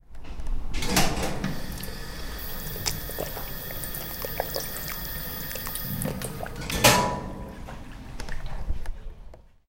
This sound is produced when we use the water pump of the library and drink water. This sound was recorded in the library of UPF in a silent environment and the recorder was near to the source.
campus-upf, drink, pump, UPF-CS13